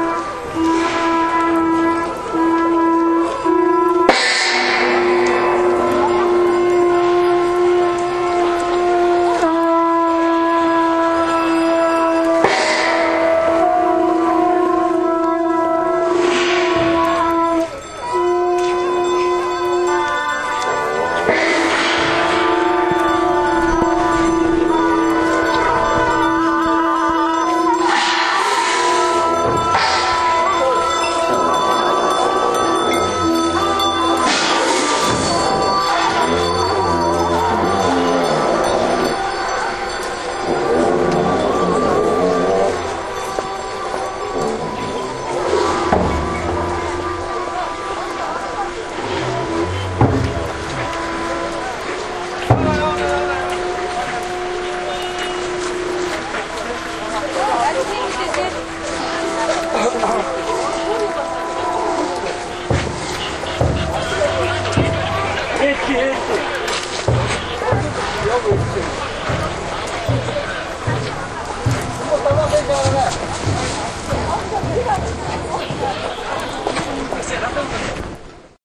a soundbite from a field recording of a maidar (future era buddha) "circumnambulation" (was nor really a circumnambulation but they kind of call it that)-a religious procession held anually on the streets of ulaanbaatar from the biggest active monastery in the country to a second biggest in the city both located on opposite sides of the central part of town. recording from may 2011.
ceremony, field-recording, maidar-circumnambulation, ulaanbaatar